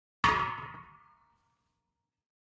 refrigerant cylinder 3
30lb container of refrigerant - about 3/4 full.
Foley sound effect.
AKG condenser microphone M-Audio Delta AP